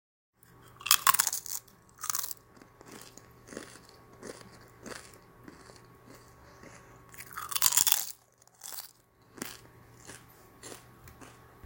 Audio papas
chewing very crispy chips
crunchy, bite, chips, papas, chew